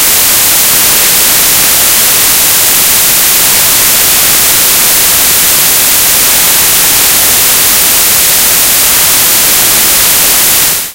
check your volume! Some of the sounds in this pack are loud and uncomfortable.
A collection of weird and sometimes frightening glitchy sounds and drones.
White noise created by importing an image into audacity as raw data.